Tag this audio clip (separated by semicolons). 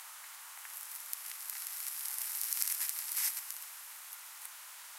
cigarette; lighter; smoking; tobacco